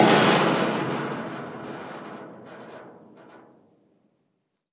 Metal cover hit Loudest
cover, hit, Loudest, Metal